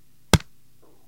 dvdbox openup
The sound opening up a plastic DVD case.